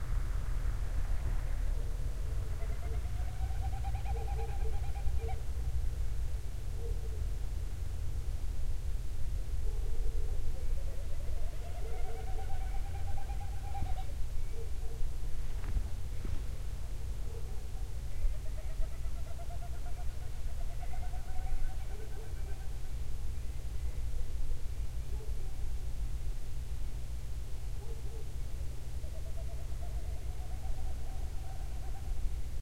Dogs Vultures 2
vultures, dogs